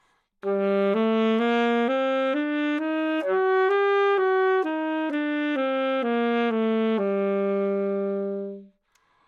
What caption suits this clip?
Part of the Good-sounds dataset of monophonic instrumental sounds.
instrument::sax_alto
note::G
good-sounds-id::6834
mode::natural minor